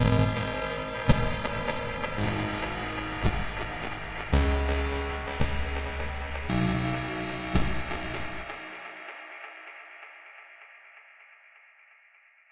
A loop of distorted stabs with some delay and reverb
processed, distortion